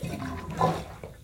My failed attempt at plunging a bath tub...